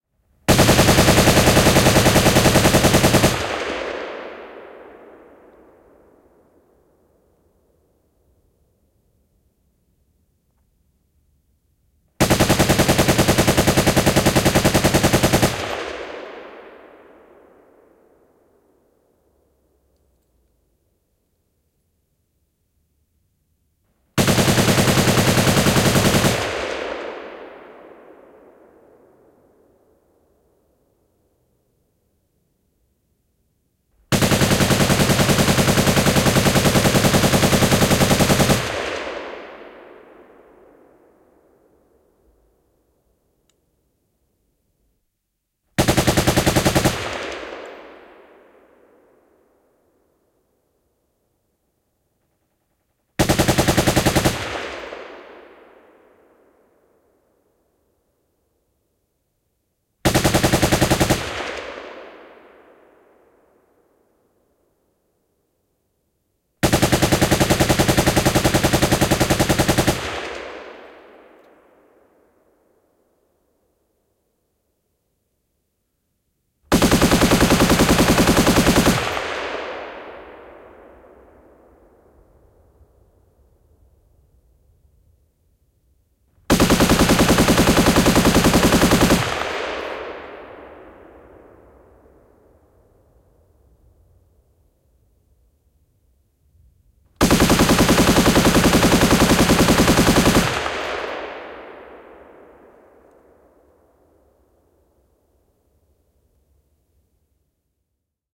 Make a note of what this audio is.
Venäläinen kk Maxim. Jykevää sarjatulta lähellä, kaikua.
Paikka/Place: Suomi / Finland / Hämeenlinna, Hätilä
Aika/Date: 01.11.1984